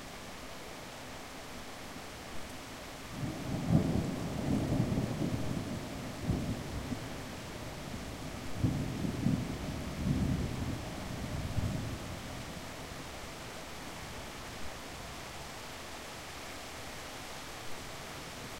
thunder noise 002
single thunder burst with light rain
field-recording, weather, rain, nature, thunder, outdoor